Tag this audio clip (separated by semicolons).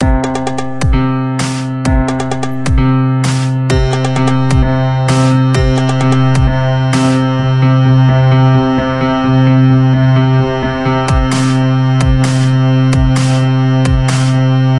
fl; Second; studio; beat